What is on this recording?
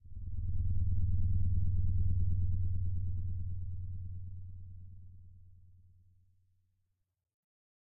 A creepy, bass heavy droning hit, made by a synth.